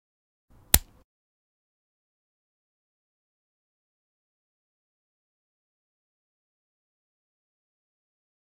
OWI Carrot Snap
snap, bone, crack, bone-break